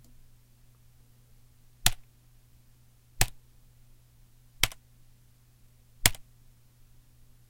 tapping keys on a computer keyboard
MTC500-M002-s14, enter, key, keyboard, typing